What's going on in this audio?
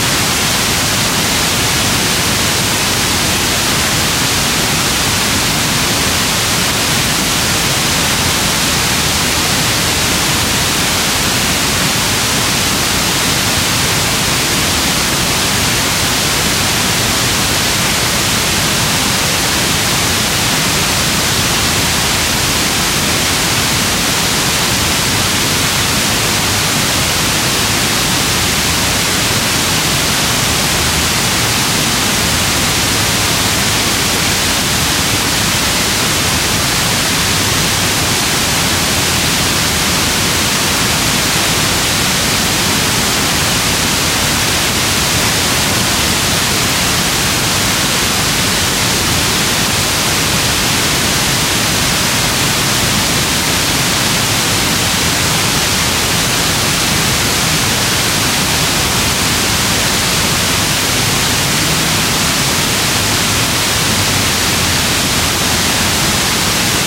FM Static
A dual mono recording of an FM/VHF tuner.
vhf, tuning, loop, radio, static, fm, tuner, mono